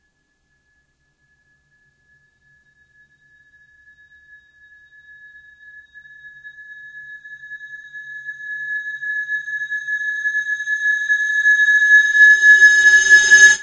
glass buildup
I just hit a glass with a spoon. Contains a little intended oversteer at the end. Recorded with the t.bone SC450USB.
Edit 2022: If you have published something with this sound on the internet or I can look at it some other way, you can DM it to me if you want. I would love to see what it has been used for!
buildup, transition, movie, cinematic, cinema, scarry, build-up, glass, tension